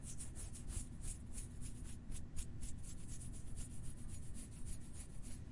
Arm Scratch Fast
Simple arm scratch
arm, scratch, scratches, scratching